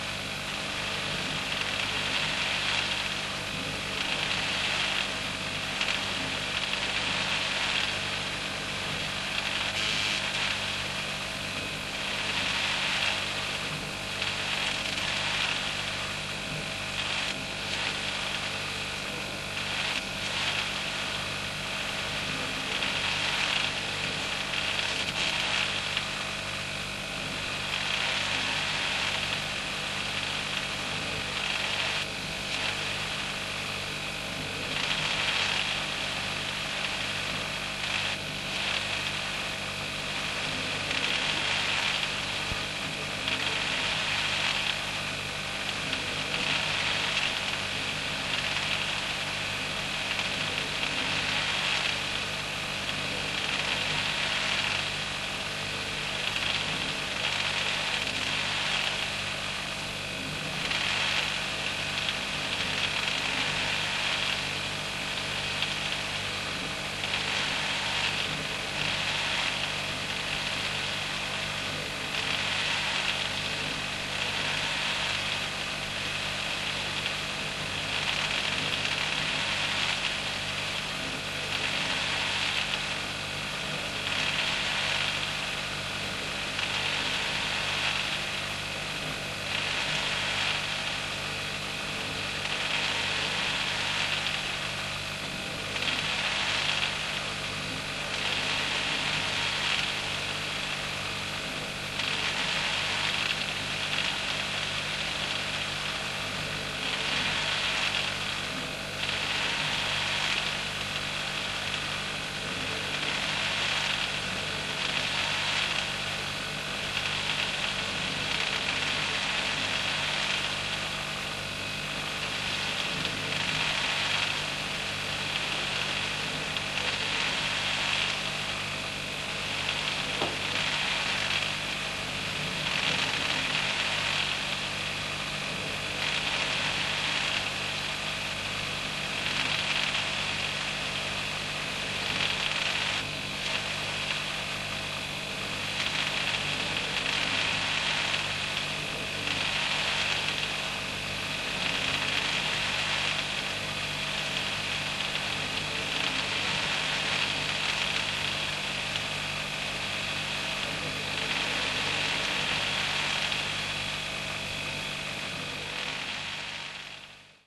This is the sound of an under-counter fridge freezer... perhaps, er, freezing something.
Freezer, Whir